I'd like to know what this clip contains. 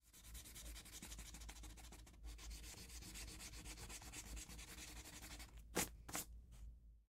notepad eraser
an eraser on a notepad, and then a hand brushing away the remnants
eraser, erasing, notepad